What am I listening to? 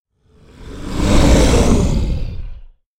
I made this "cute" sound on my own human sound, recorded some noise, pitched it, paned it all in PT. Thanks